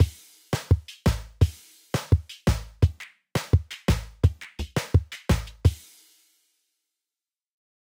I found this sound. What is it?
Chilly Billy 86BPM
A chilling drum loop perfect for modern zouk music. Made with FL Studio (86 BPM).